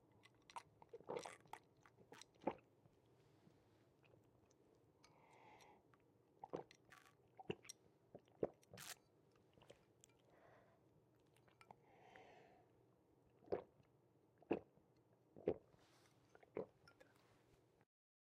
drinking water with gulps and breath